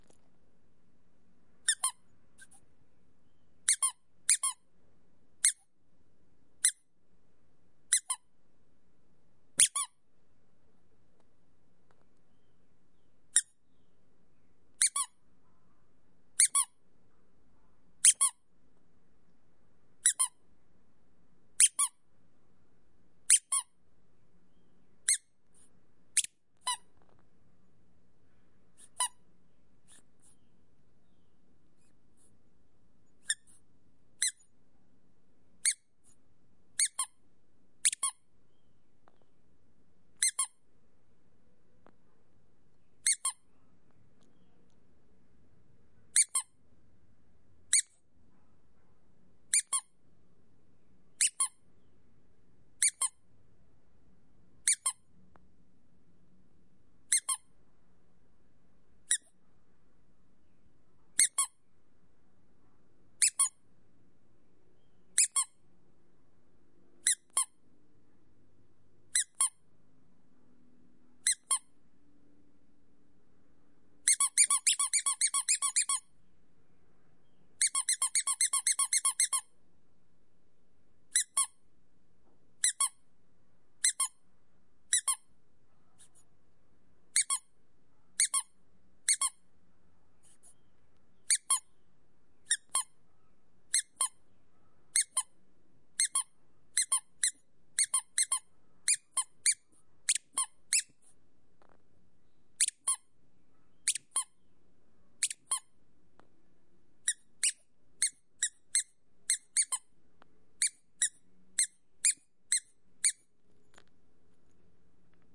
squeak-toy-small-squeeze full01
A rubber squeaky toy being squeezed. It's a smaller toy than the one from my other "squeak-toy-squeeze" sounds. Recorded with a Zoom H4n portable recorder.